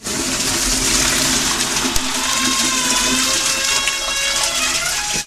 A recording of flushing the toilet’s water, followed by a groaning door closing sound.
Production stages : This sound was originally recorded by a group of students at University Lyon 3. Then it was imported to Audacity where few modifications were made. First of all, the record was precisely cut so there are no human voices in the background. Then, the sound was normalized and slightly compressed before applying the noise reduction effect. Last, but not least a constant gain was added at the beginning and lightly fading effect took his place at the end.
Son du type X (continu complexe)
C’est un groupe nodal des sons saturés avec une hauteur variée, suivis d’un bruit d’une haute hauteur.
Le son est cannelé et constant et finisse par le son de la porte qui est métallique et aigu
L’attaque du son est rapide et forte, plutôt abrupte. Le grain est frémissement et rugueux
Et l’allure est naturelle, désordonnée et aléatoire